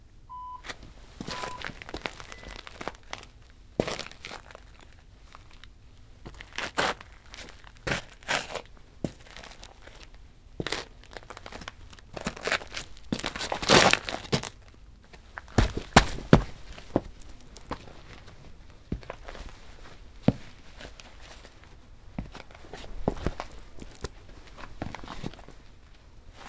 Recorded on the Foley stage at the Chapman University film school for my Audio Techniques class. I am doing a Foley walk on tile covered with gritty dirt, as well as a small amount of clothing rustle.
This syncs with the scene in the beginning of Indiana Jones (Raiders of The Lost Ark) where Indy is walking towards the golden sculpture, across dirty tile and then up steps and onto what we assumed to be a cleaner surface.
This is the third (and best) take, as I pretty much nailed the timing.
crunchy, dirt, dirty, foley, footstep, footsteps, grit, human, rustle, tile, walk